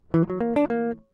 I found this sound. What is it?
guitar chromatic 4
apstract, acid, pattern, funk, jazzy, fusion, licks, jazz, lines, groovie, guitar